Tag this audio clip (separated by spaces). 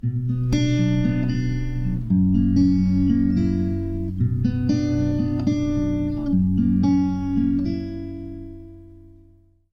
acoustic calm charisma guitar